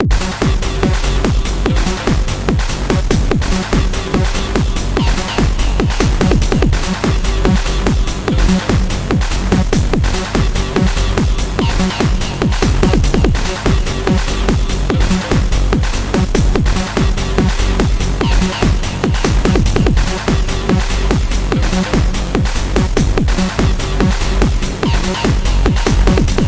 HARDER RAVE FINAL BY KRIS KLAVENES
club, harder-rave, dance-music, techno, wave, dance, rave, night-club, sound, music, disco, party